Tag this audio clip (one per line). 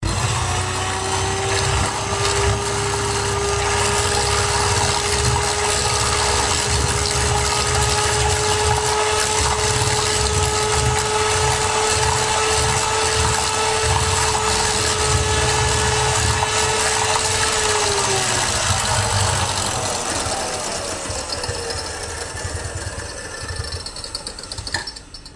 kitchen
Turmix